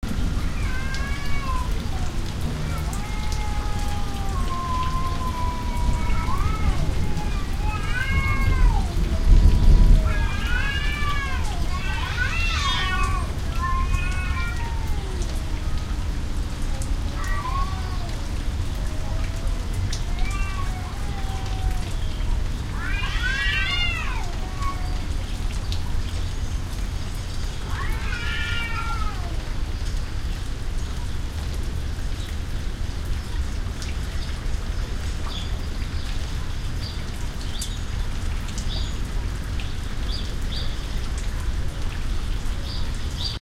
Kuba in a cat fight outside in the rain and thunder
Cat Fight in a Thunder Storm
1960s, a, Neumann, recorded, u87